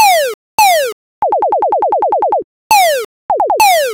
COULEAUD Celia 2021 2022 LaserShots
This sound was entirely created on Audacity. I generated "chirps" at different frequencies and on different duration.
It represents a battle or different laser shots that can be used in different science fiction projects.
gun, laser, videogame, weapon, shot, spaceship, alien, sci-fi, space, science-fiction